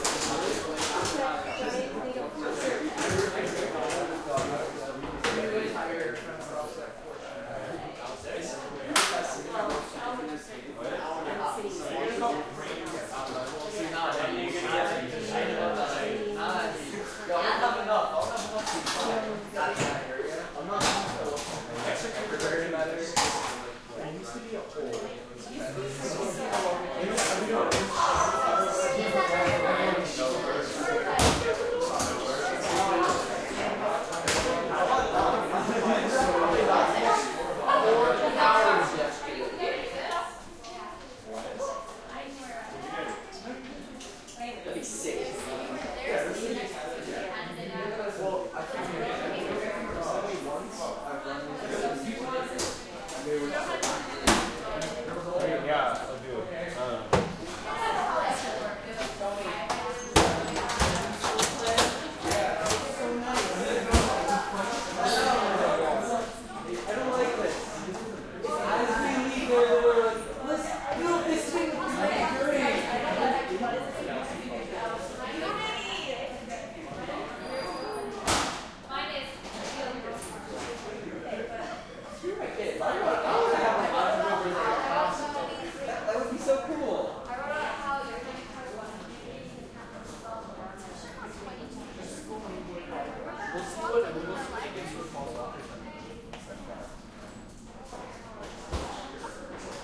crowd int high school hallway tight light active seniors and some close lockers2